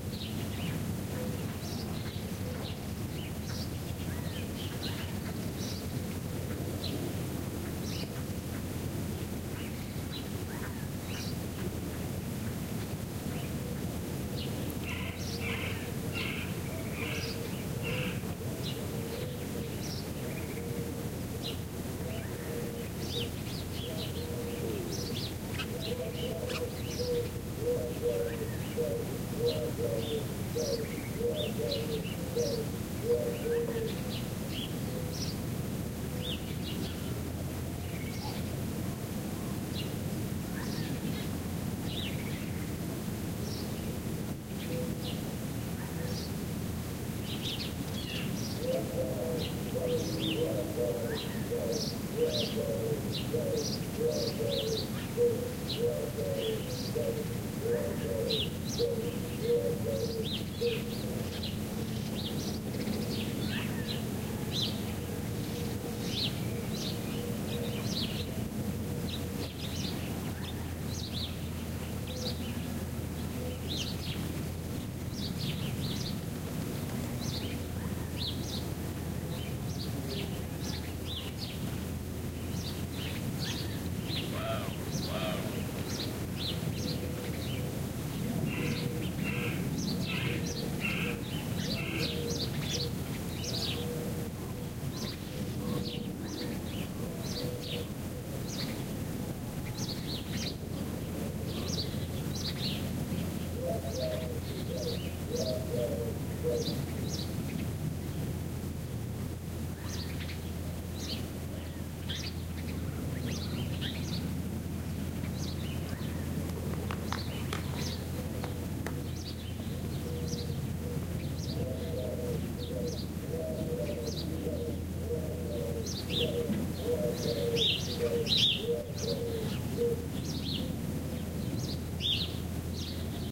Arizona Air
Recorded south of Tucson birds sound of the desert. Recorded on a Zoom H2
spring, nature, desert, field-recording, birds